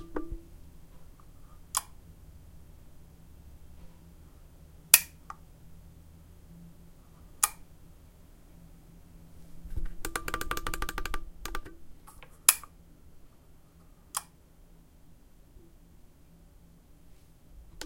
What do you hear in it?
clicks lamp
clicks, lamp, switch